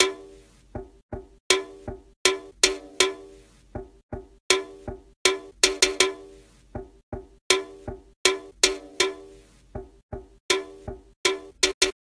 boxbeat80bpm
80bpm rythmicloop made from hitting a sodacan. No effects just pure sounds from the metalbox.
improvised, loop, drum-loop, percs, rhythm, beat